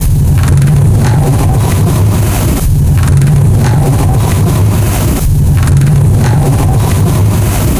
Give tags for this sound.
game gear ghost horror horror-effects horror-fx terrifying terror thrill

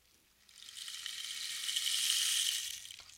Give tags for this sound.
hit homemade Latin Rain sample sound Stick wood

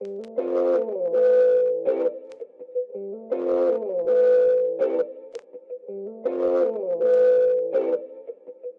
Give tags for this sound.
electric-guitar riff vinyl

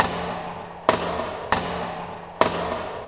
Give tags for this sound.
Can Trash MTC500-M002-s14 Hits

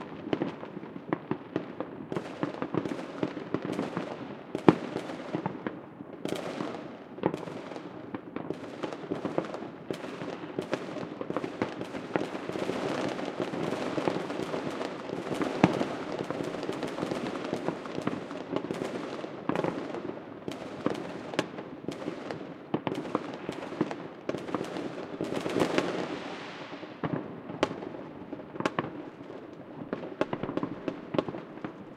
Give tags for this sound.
background explosion firework fire-works fireworks new rocket rockets year

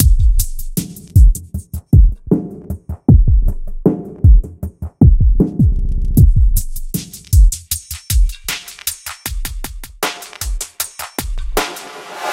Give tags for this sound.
beats downtempo hop oundesign processed trip-hop triphop

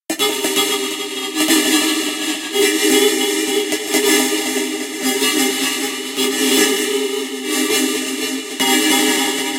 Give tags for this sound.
pad desolate squarewave metallic chord square-wave synth

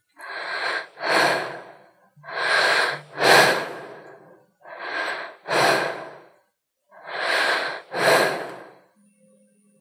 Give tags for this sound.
Scream Action Shout Female War Foley Battle Fight

